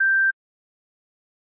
headset volume
This is the sound you hear when you turn the logitech h600 up to maximum and minimum.
headphones, effect, blip, beep, headset, bleep, ping